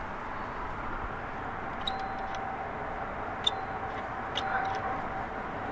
Pressing a doorbell
ringing a doorbell from the outside
bell, creaking, field-recording